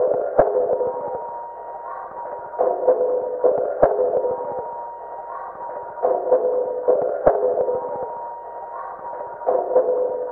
Looped Airport Noise 1
A somewhat tribal-sounding "beat" made by looping a sample from one of my recordings.